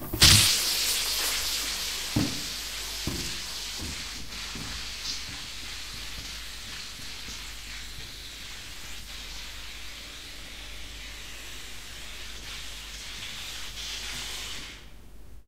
Balloon Flying Away
A balloon full of air takes a leave.
empty, air, flying-away, away, blow